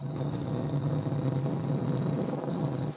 Rumbling breath through the alto sax.